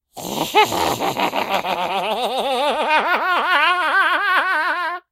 Evil Laugh 10
crazy, creature, demented, evil, goblin, imp, insane, laugh, laughter, mad, male, monster, psychotic